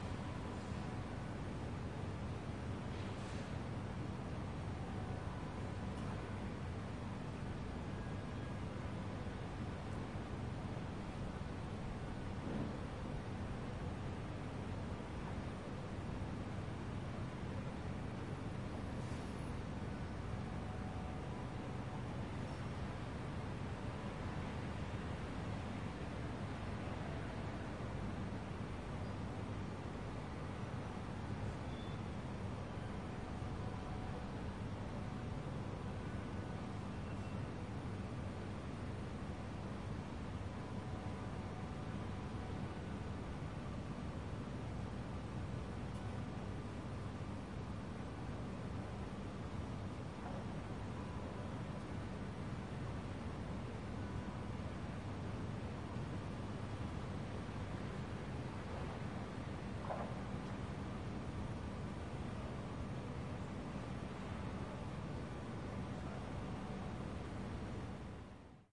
City Skyline Rooftops Noisy London
City, London, Noisy, Rooftops, Skyline